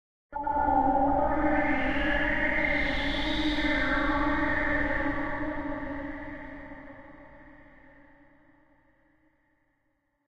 cat synth
A cat crying. Purely synth generated - part of my Strange and Sci-fi pack which aims to provide sounds for use as backgrounds to music, film, animation, or even games.
atmosphere, music, sci-fi, city, dark, processed, cat, space, electronic, strange, animal, ambience, synth